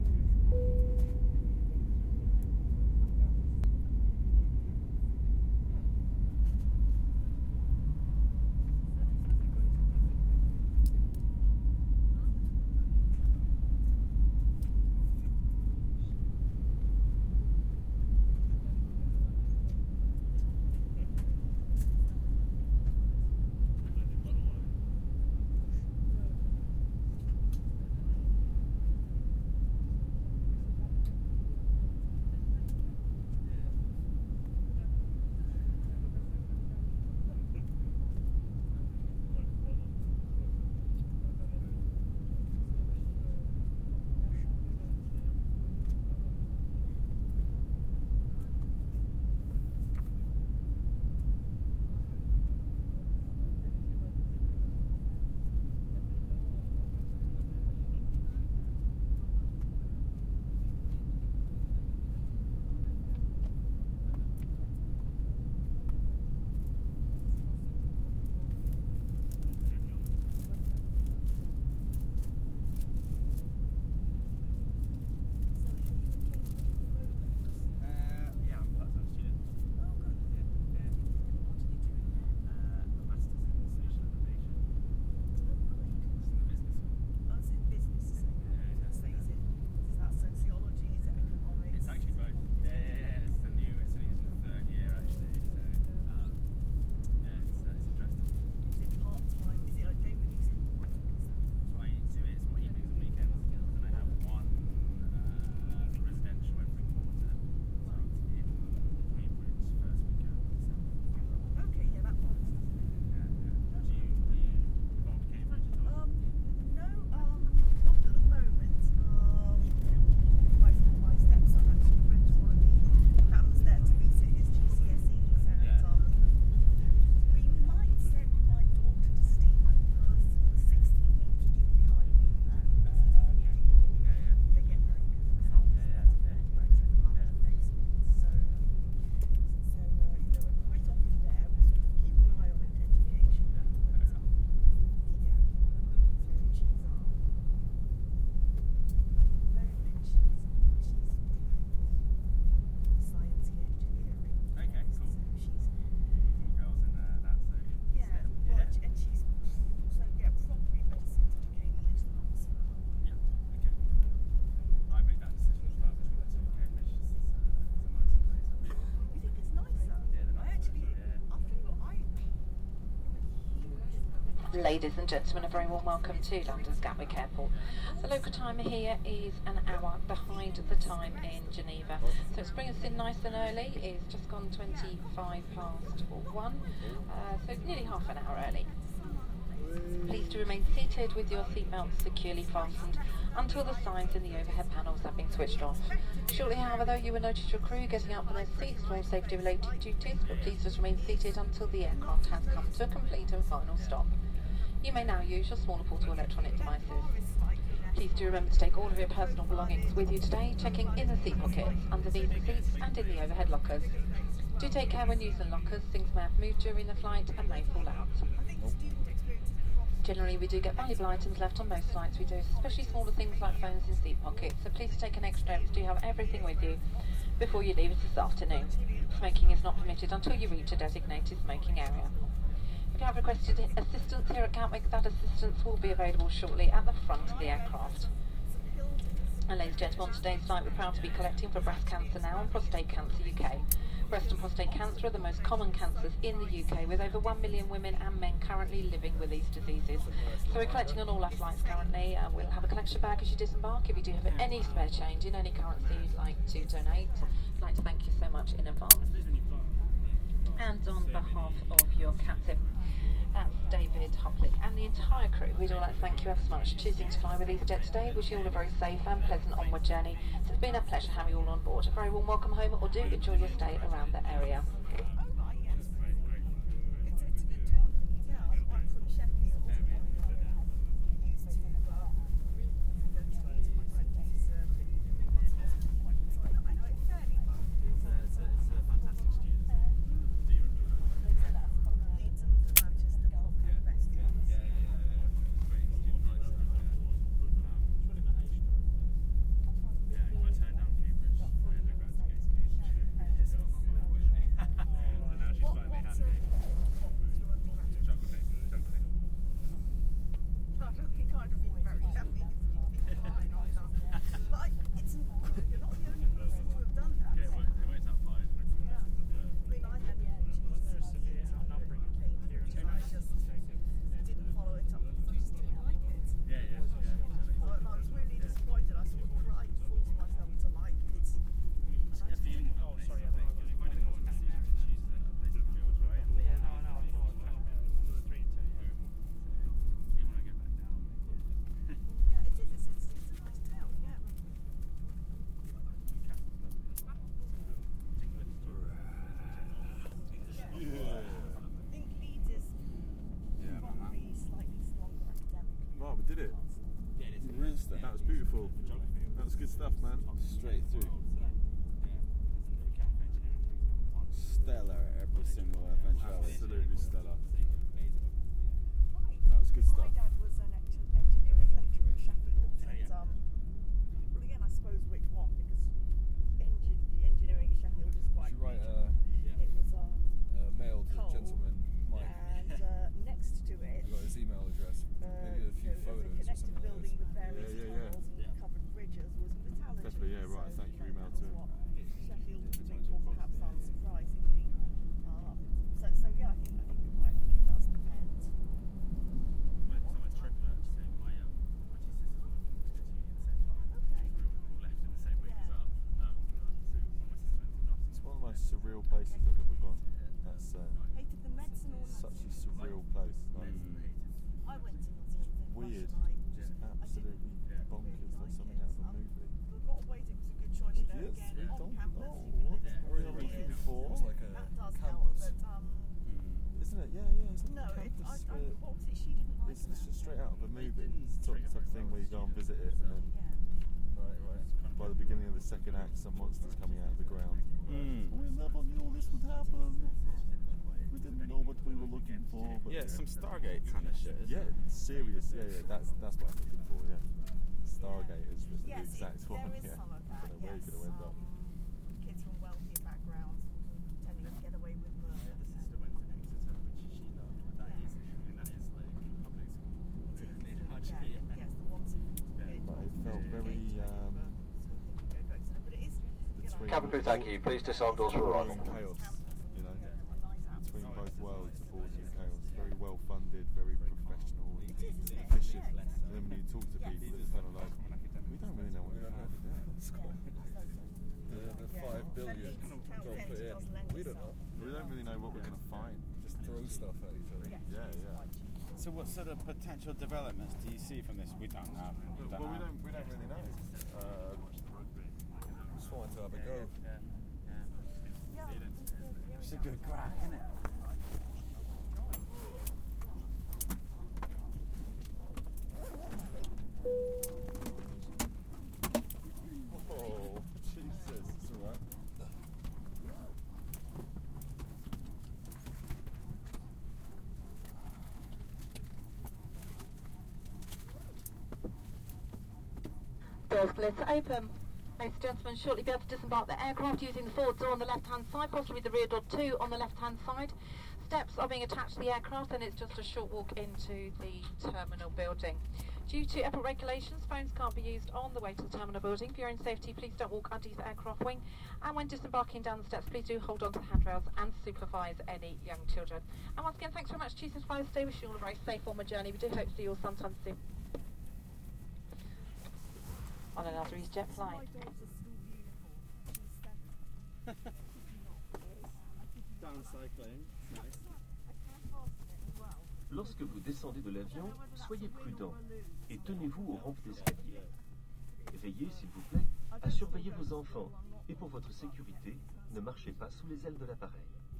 plane chime, plane landing, intercom announcements, people talking